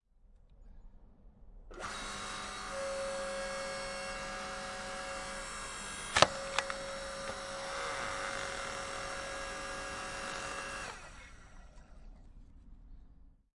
Hydraulic log splitter
An 8-ton log splitter splits a log making a large crack followed by some satisfying creaking noises.
Zoom F3, pluggy mics
splinter, machine, wood